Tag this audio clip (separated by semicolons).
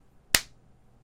Slap; Fight; Punch; Hit